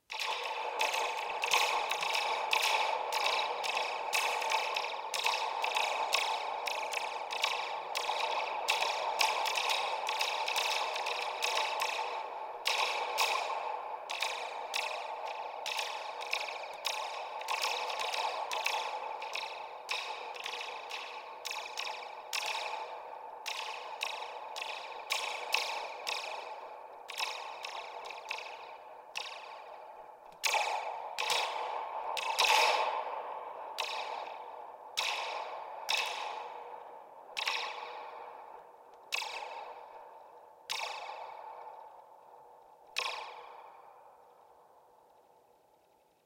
zubetube3-horizontal
I have an old toy called a Zube Tube which is a cardboard tube with a plastic cup in each end and a long spring stretched between the cups. When you shake the tube it makes the weirdest sounds! In this recording I am holding the tube vertically but shaking it horizontally so that the spring smacks against the inside of the tube.
sci-fi, spring, tube